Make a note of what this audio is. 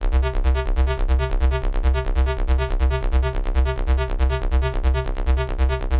Bass-Middle
A bassline I created from synthesizing a simple bassline.